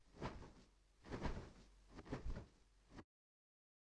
flag wave effect